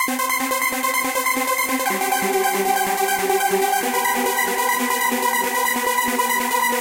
Live Trance Synth 01
arp, live, melody, sequenced, synths, trance